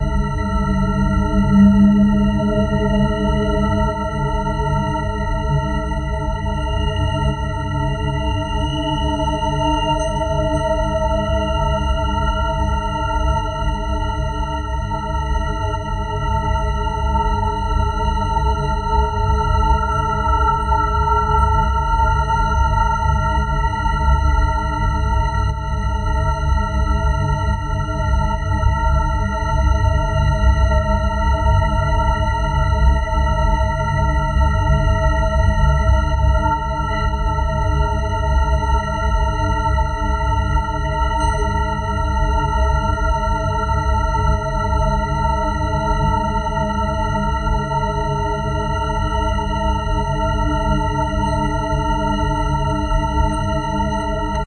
a higher tone with inharmonious Resonance, an effect that can be included in a thriller scene or other uses.